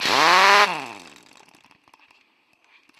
Chicago Pneumatic model A impact wrench started on in the air.